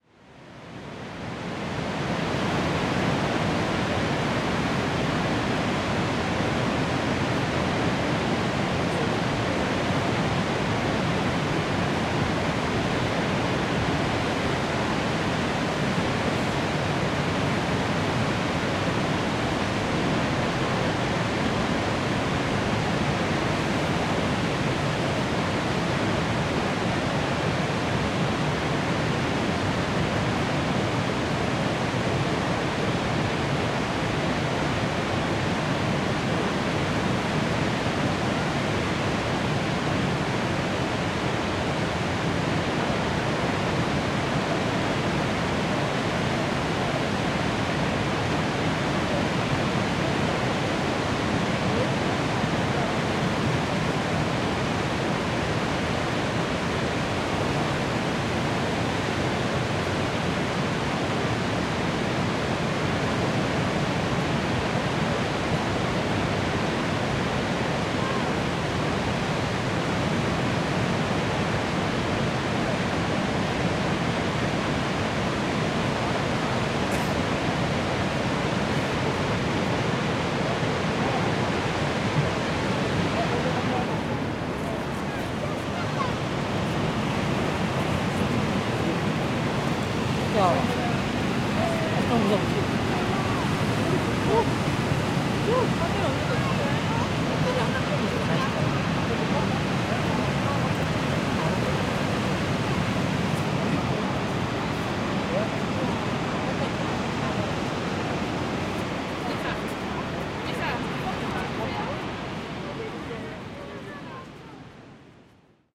02.05.2017: noise of Niagara Falls (Horseshoe Falls) in Ontario, Canada.